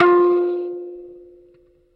Tones from a small electric kalimba (thumb-piano) played with healthy distortion through a miniature amplifier.
amp, kalimba, bloop, mbira, thumb-piano, piezo, tines, blip, bleep